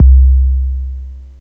this is a emulation of an 808 Kick drum witch I created on My Korg Ms-2000
nice for drum and bass or hip hop.
use as a single hit or to play bass lines. works good as both.